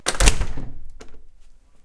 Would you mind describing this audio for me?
A heavy fire door being closed- with plenty of lock noise